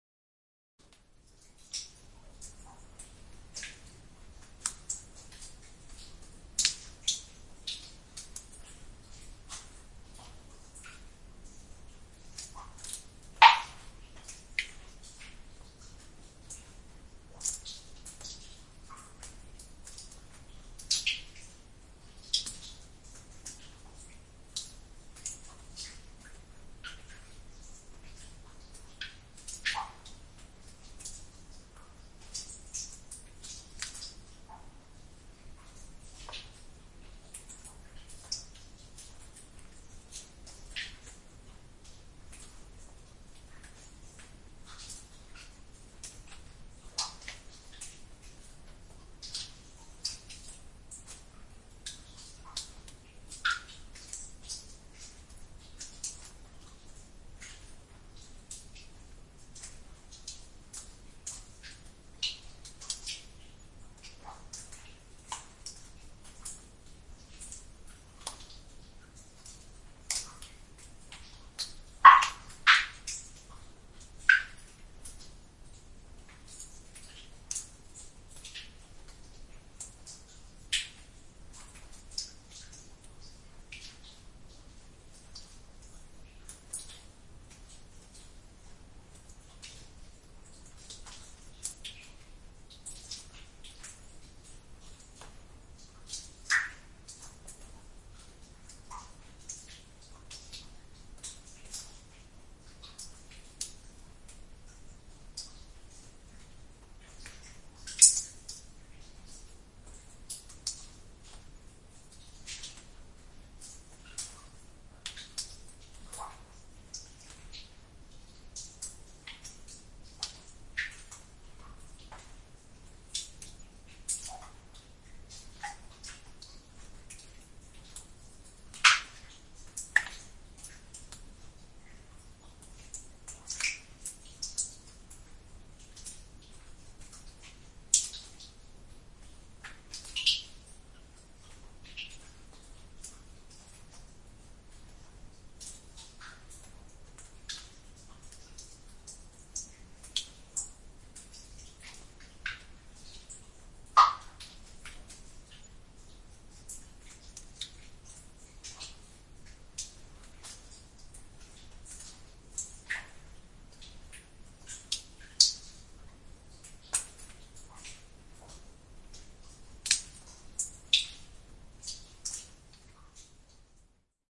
This sound was recorded in a cave "Ledyanaya" near the town of Staritsa in Russia.
To prepare the sound, I used a limiter and a little denoiser.